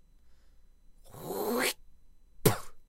NPX Throat Clearing and Spit %22puh%22 3